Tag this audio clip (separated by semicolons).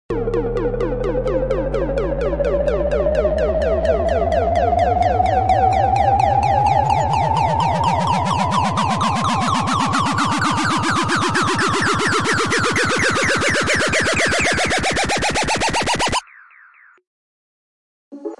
alarm; wasp; ascending; lifter; uplifters; sweep; layered; sound